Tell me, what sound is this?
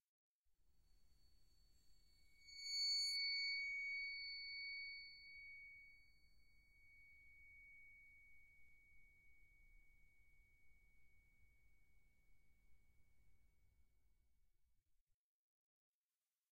This is the sound of the crotalis 'played' with a bow